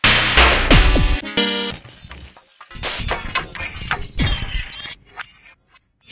BZH HRMN
Rhythmic pattern created from sampled and processed extended trumpet techniques. Blowing, valve noise, tapping etc. materials from a larger work called "Break Zero Hue"
break, experimental, extended-techniques, glitch, hiss, trumpet